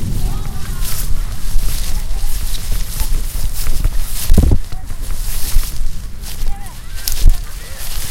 Walk through the pine in Prat of Llobregat. Recorded with a Zoom H1 recorder.